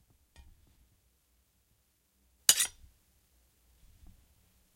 Union Sword Pull Away 1
Crappy Replica of a Civil War Union cavalry sword. All of these are rough around the edges, but the meat of the sound is clear, and should be easy enough to work with.
Action, Civil-War, Lock, Pull-Away, Sword, Weapon